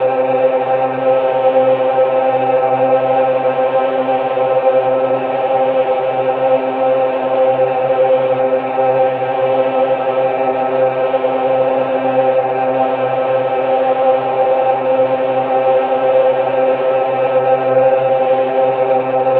heavily processed sounds form the symbiotic waves module by pittsburgh modular. Filtered through the Intellijel Atlantis Filter. Effects were minifooger chorus and occasionally strymon delay or flint. The name give a hint which oscillator model and processor were used.